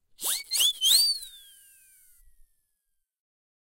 Siren Whistle 02
Siren Whistle - effect used a lot in classic animation. Recorded with Zoom H4